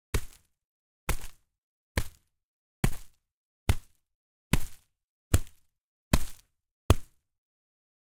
Stomping in a forest.
forest, step, stomp, walk